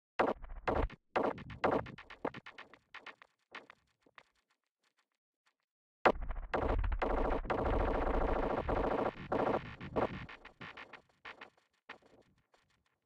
Abstract Amp Glitch (processed & reversed guitar effect)
abstract, amp, delay, glitch, guitar, processed, reverse